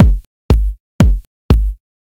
kick loop-01
compressed kick loop variations drum beat drums hard techno dance quantized drum-loop groovy kick
loop, compressed, hard, techno, drum, dance, variations, beat, groovy, quantized, drums, drum-loop